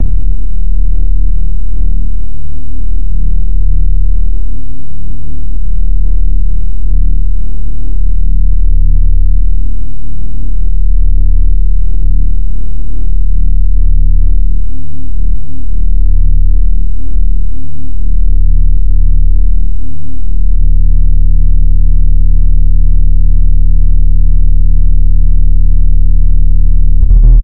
Extreme Dubstep Wobbly Bass
My Bass wobbles. I made them using FL using a bunch of bass samples and overlapping them to wobble. The sound wave was unexpectedly not loud. It was supposed to be extremely loud.
140, Bass, Dubstep, WRUOOBWOOB, Extreme, WOBBLY